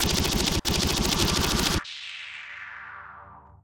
IDM Click 2
Glitch Clicks..... No?
glitch, 999-bpm, melody, cinema, soundscape, idm